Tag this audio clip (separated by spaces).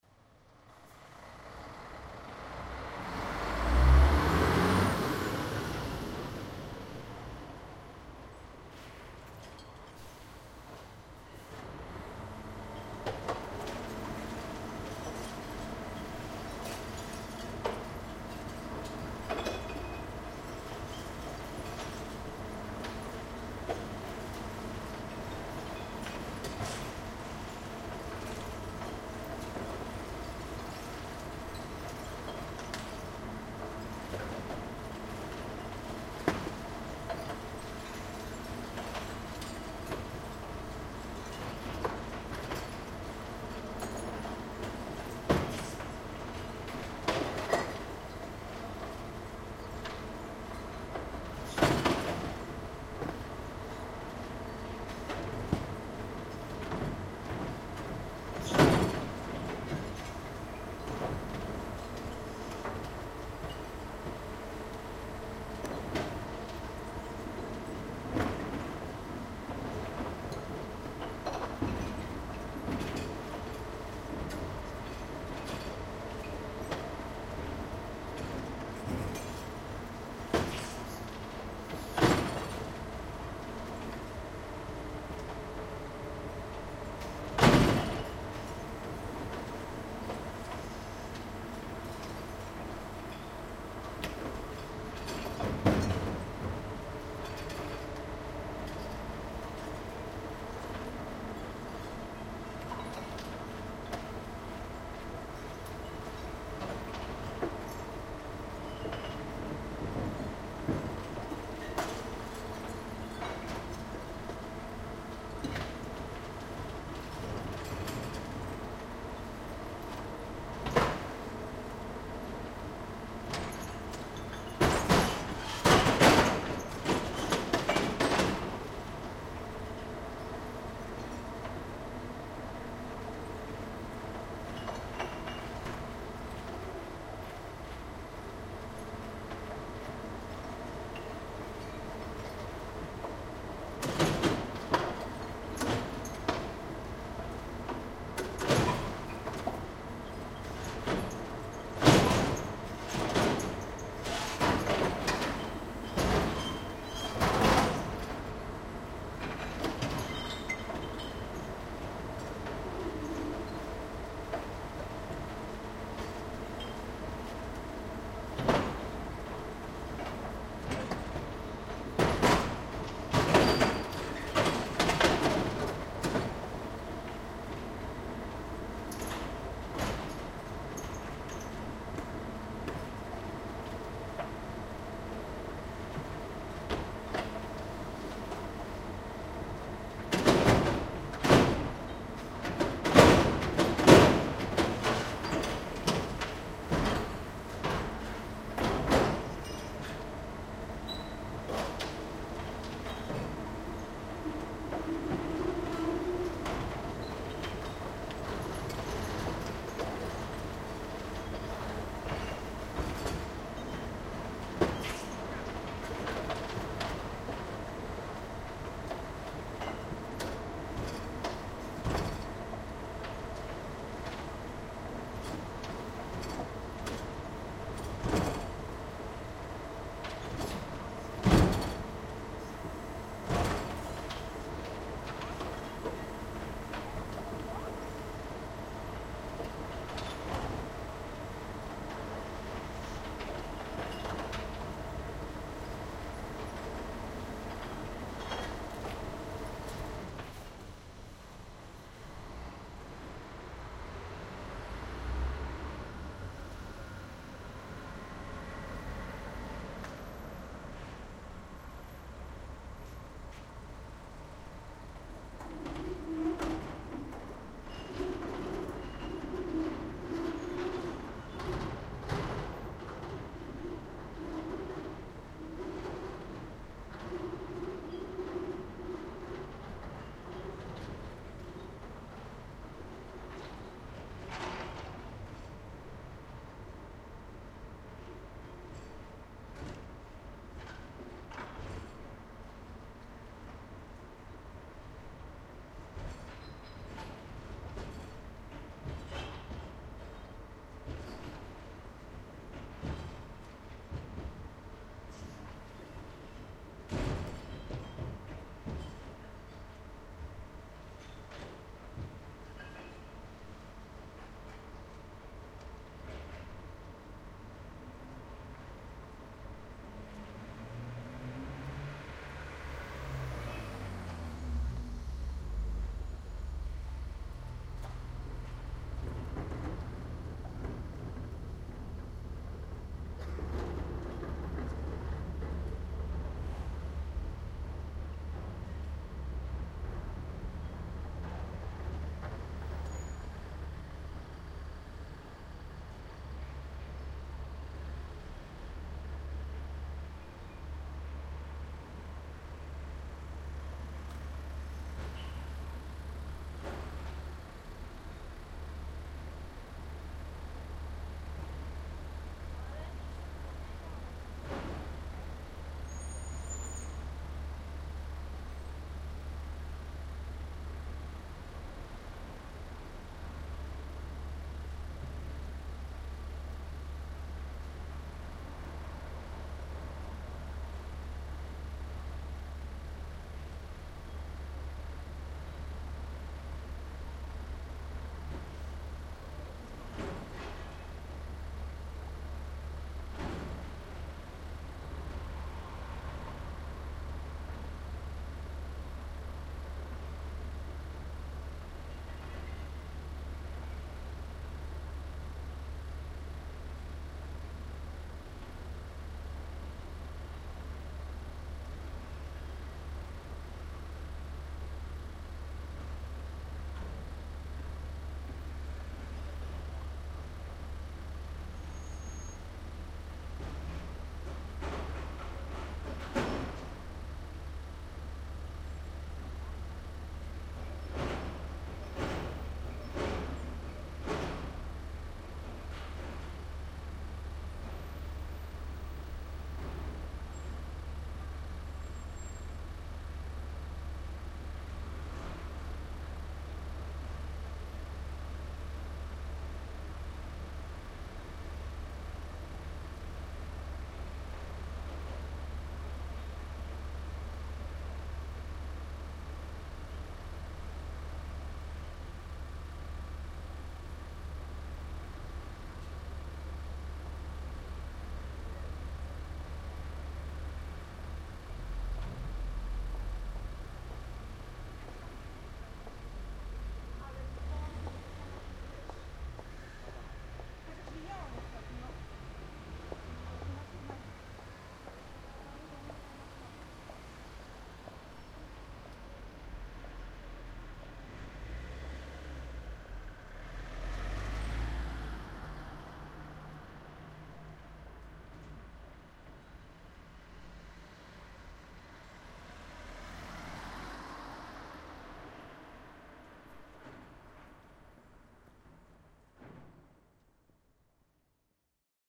field-recording truck dustcart garbade poznan street garbage-truck poeple poland